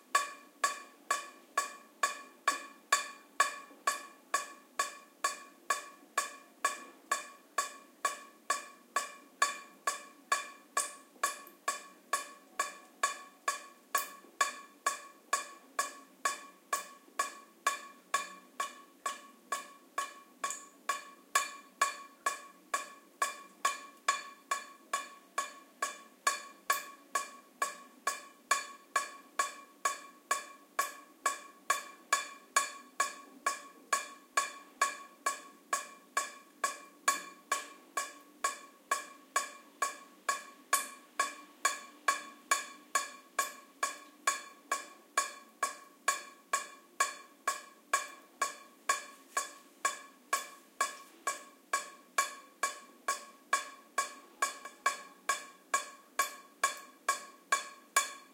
dripping sound. AT BP4025, Shure FP24 preamp, PCM M10 recorder